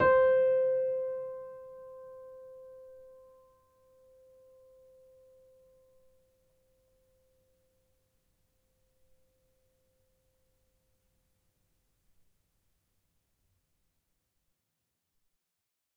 upright choiseul piano multisample recorded using zoom H4n
choiseul, upright, multisample, piano